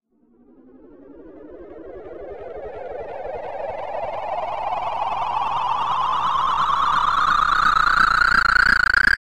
Bubble Noize
bubble, noize, digital